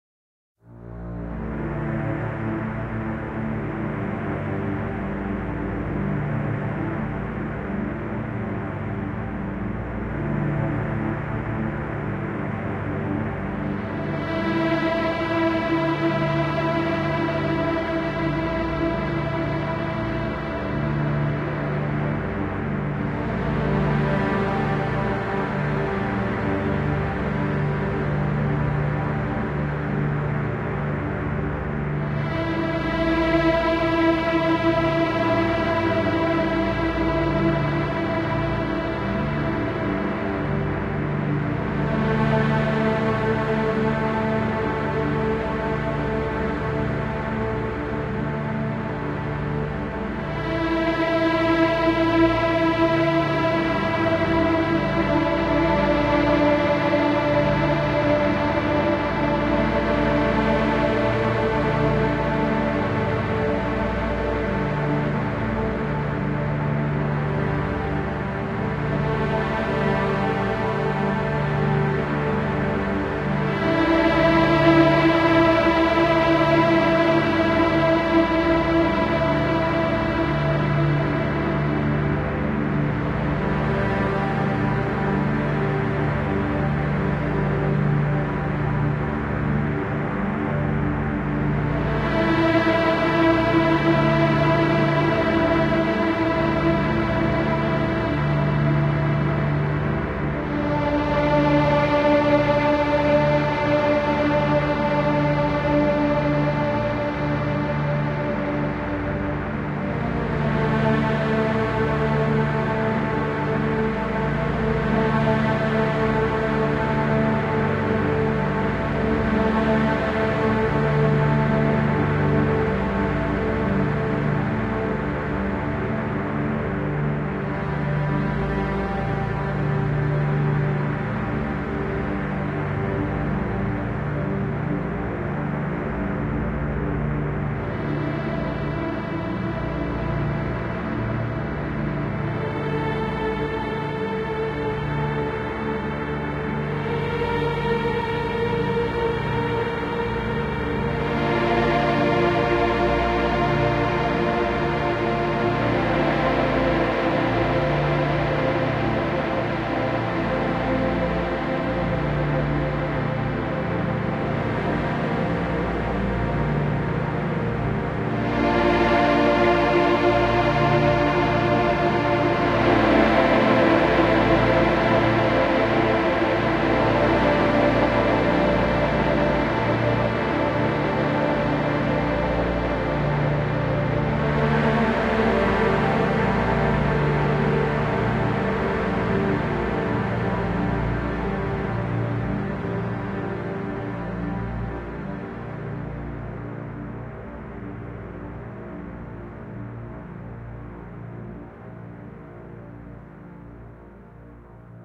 Sweeping Sad Strings
A sweeping, sad, orchestral strings sound.
This was a MIDI improvised at the keyboard with a synth pad voice to give my fingers the right touch for strings. Should have played right into the daw with a MIDI cord because there was lots of distortion translating the MIDI to the daw voice. I used the All Strings orchestral voice in Music Maker's virtual synthesizer - compression and wider stereo helped, then I lowered some of the overbearing bass and brought up the mid-range.
cello, cinematic, dark, deep, dramatic, moody, mournful, movie, orchestra, orchestral, sad, slow, soulful, strings, sweeping, tragic, viola, violin